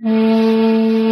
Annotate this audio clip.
It's loopable as well.